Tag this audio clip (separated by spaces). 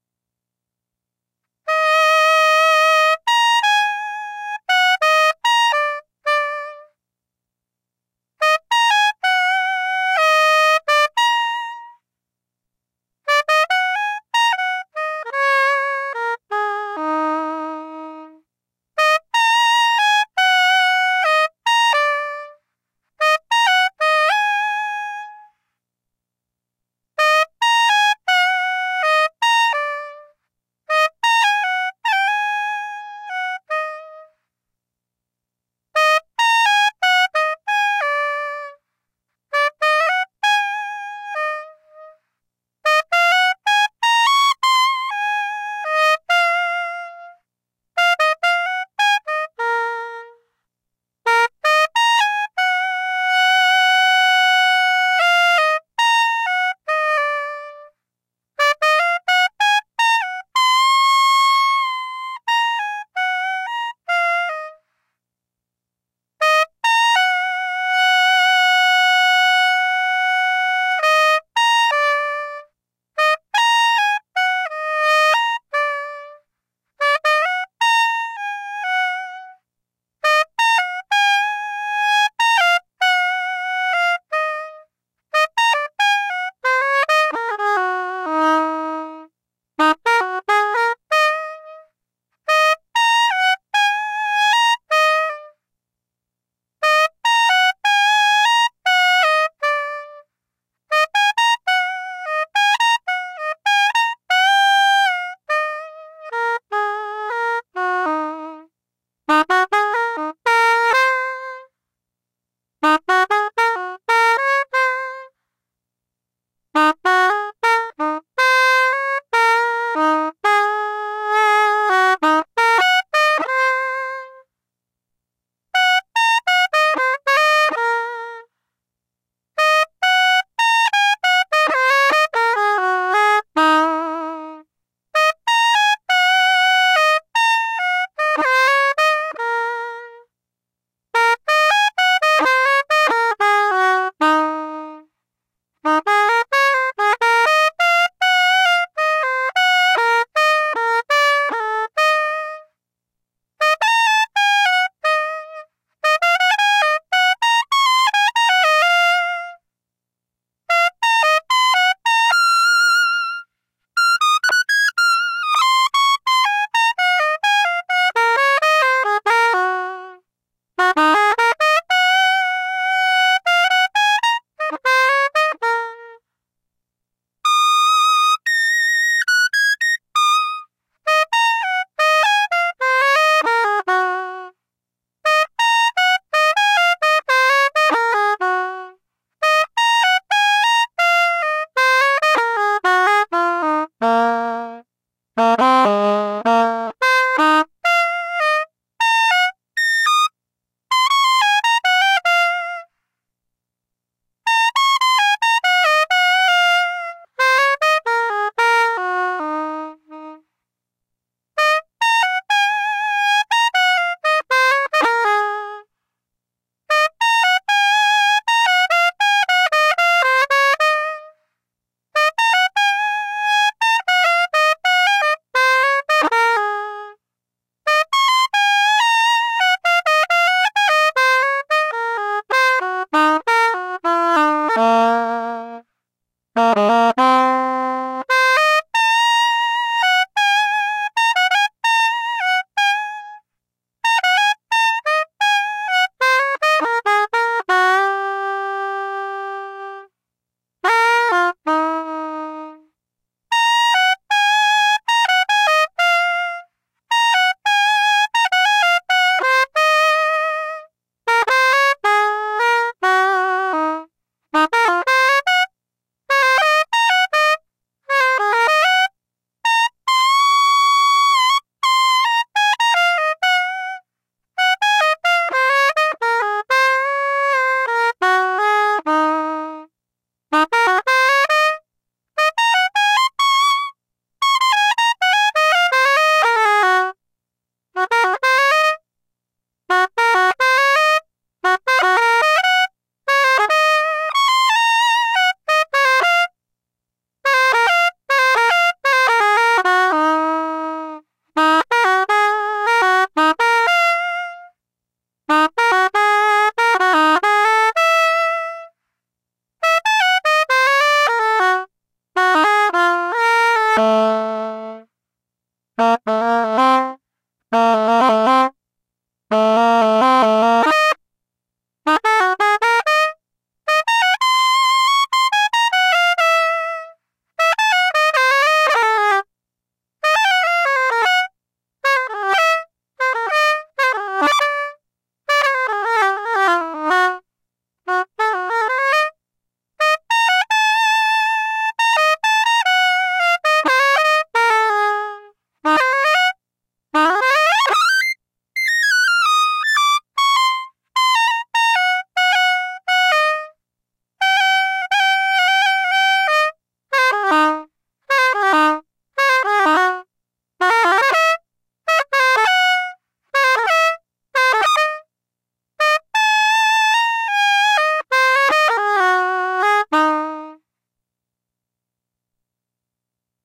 dry noodling physical-modelling-synthesis saxophone-emulation SoprSax windcontroller Yamaha-VL70-m